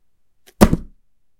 Dropping a book